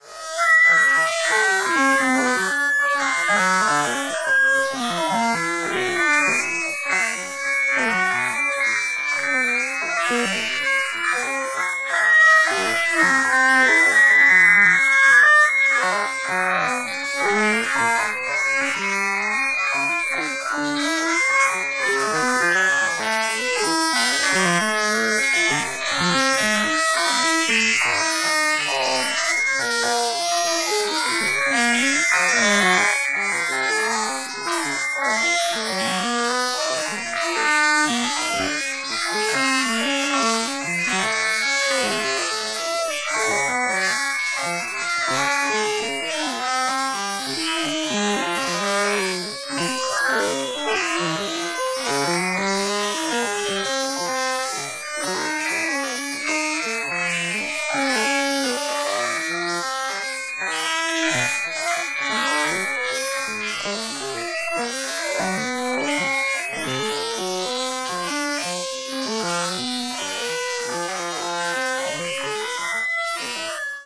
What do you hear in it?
Singing alien
An awful song by an alien who would never make it to Alien’s Got Talent! Sample generated via computer synthesis.